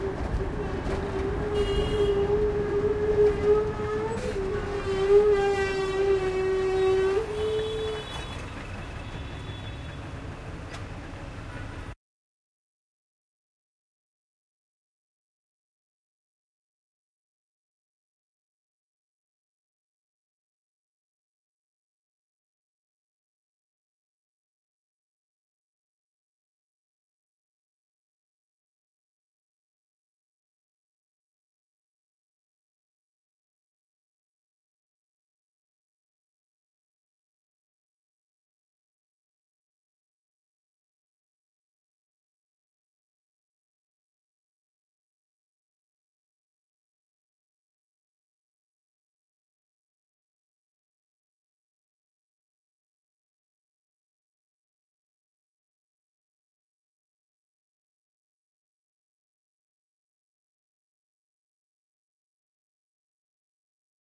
Squeeky Truck Brake
Sounds recorded from roads of Mumbai.
field-recording, India, Mumbai, road